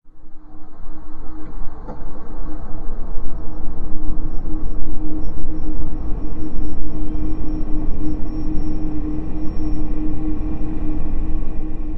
18 ca pad empty city
empty city street suspense drama
ambiance, ambience, ambient, atmo, atmos, atmosphere, atmospheric, city, fi, general-noise, music, sci, sci-fi, score, soundscape, white-noise